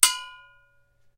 recordings of variouts trumpet extended techniques, performed by David Bithell, recorded by Ali Momeni with a Neumann mics (marked .L) and an earthwords (marked .R). Dynamics are indicated with from pp (very soft) to ff (very loud). V indecas valve, s and l indicate short and long, pitches in names indicate fingered pitches,